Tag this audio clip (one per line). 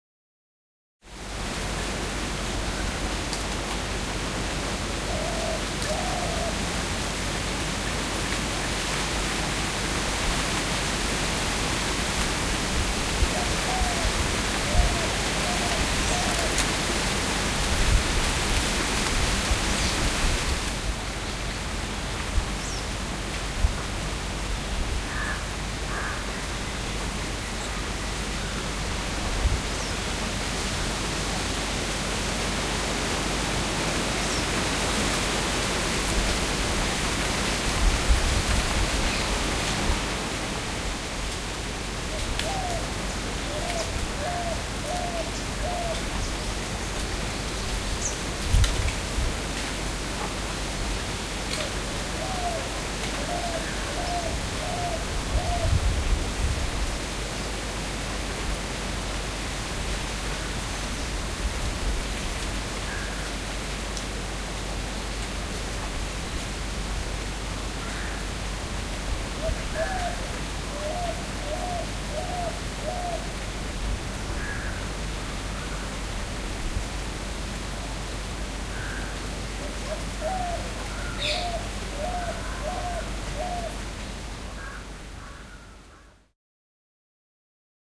Atmosphere
breeze
Farm
Field-Recording
gust
trees
wind